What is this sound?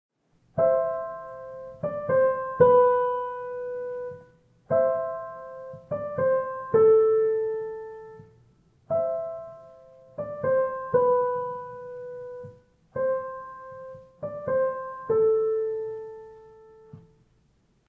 Song sample 2
A school project piano sample!
piano, ritme, sample, song